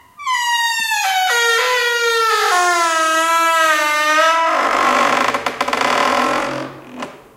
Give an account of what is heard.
Series of squeaky doors. Some in a big room, some in a smaller room. Some are a bit hissy, sorry.